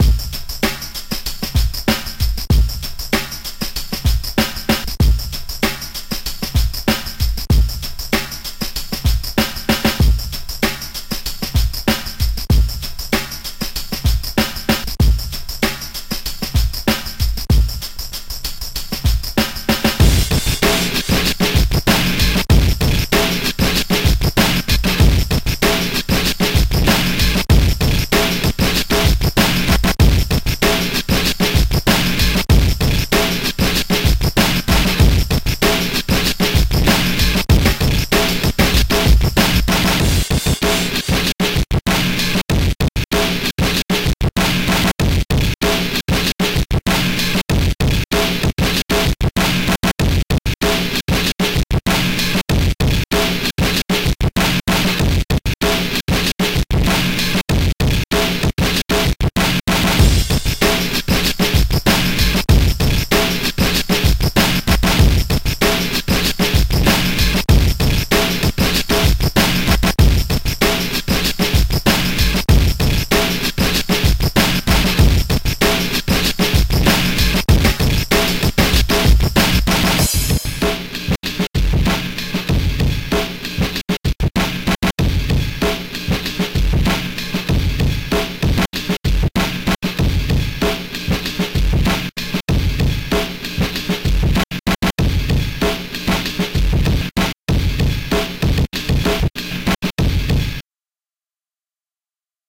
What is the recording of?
Breakbeat sequence Jungle & (distorted, brutal) Amen break- 41 [40 + end] bar - 96 BPM (no swing)
Made with Free Tuareg 1.5 and Cool Edit Pro 2.1.
This breakbeat sequence includes the hot pants break* and the amen break** (besides the four crashes added after exporting from Tuareg, mixed with Cool Edit Pro 2.1). I applied some cuts and two distorsion levels to the last one (I always loved to distort breakbeats). I made it several years ago, I think originally at 120 BPM or 140 BPM... but I wanted to check out how does it sounds at 96 BPM and I liked. Anyway, this is acid-sized (its duration is exactly 41 bars at 96 BPM), so you can change the speed to any BPM you want.
Custom scratching: Fiverr
beat, bigbeat, break, breakbeat, breaks, distort, groovy, hard, hardcore, phat, thick